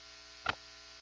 wingbeat frequency for aedes aegypti
aegypti, wingbeat, insect, aedes